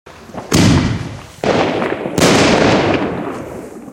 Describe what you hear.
firework background 01
Ambient firework sounds
ambient, bang, boom, destroy, explosion, firework, fire-works, fireworks, long, wide